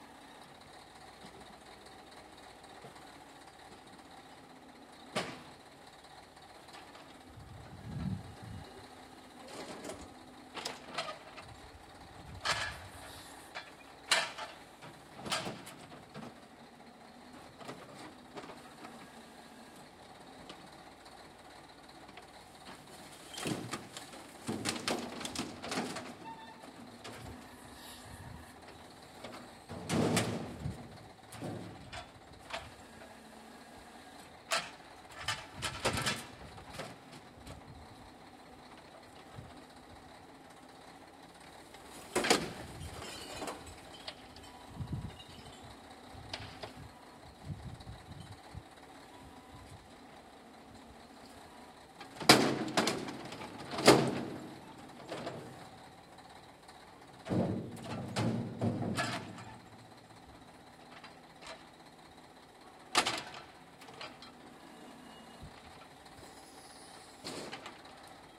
Scrapyard in the yard. Garbage removal using special truck. Worker operate the mechanical arm.
AB-stereo.
Date recorded 2012-09-24